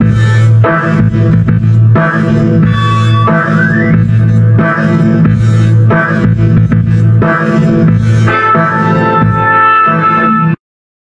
break processed
Funk break heavily processed with Max/MSP. Because of processing it has no dynamic, but It could be easily created with envelopes or maybe a side-chain compressors.